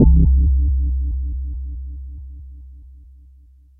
a thick fm bass with a little bell tinkle hidden inside. dull attack, wobbly decay. elektron sfx60's FM PAR machine. this sound is soloed from 060102yohaYohLoop128steps117bpmMulch
heavy, dull, sfx60, bass, fm, sfx-60, virtual-analog, wobble, monomachine, elektron, 060102, thick, bell, frequency-modulation